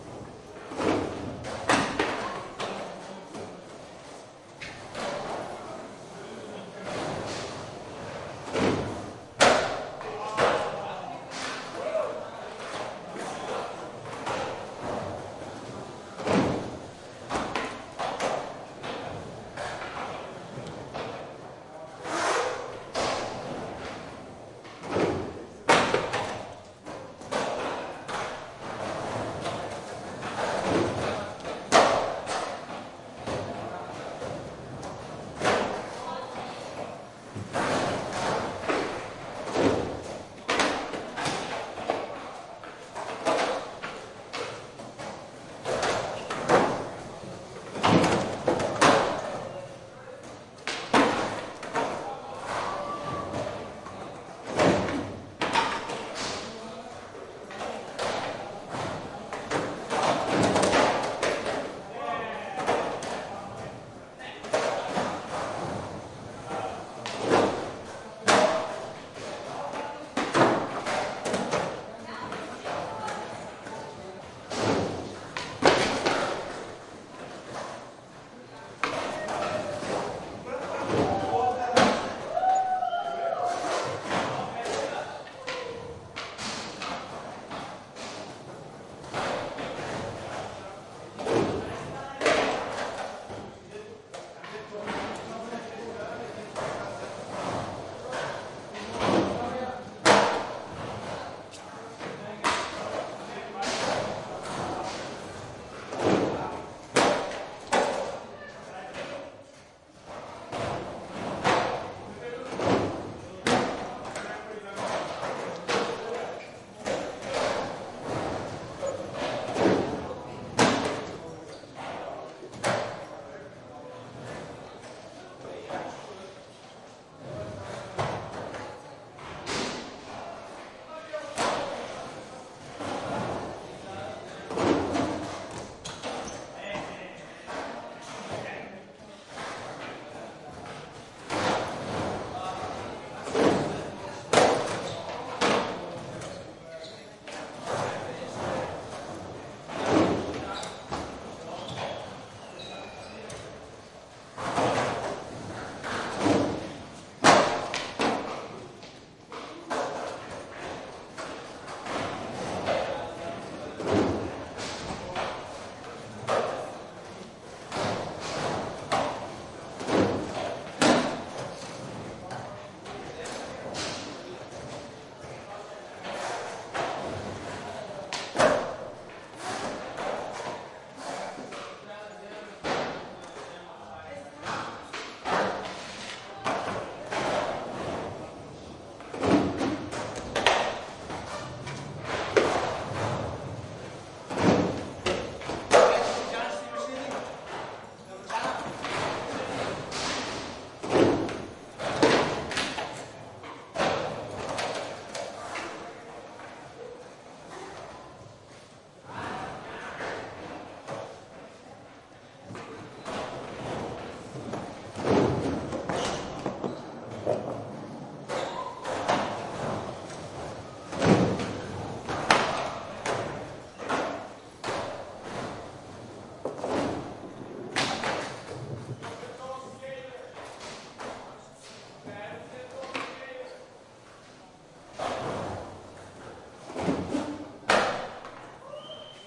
stere-atmo-schoeps-m-s-sk8
many kids skateboard indoors
indoors, kids, skateboarding